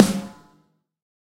MDV SNARE 001
Heavier real snares phase-matched, layered and processed.
drum, processed, real, rock, sample, snare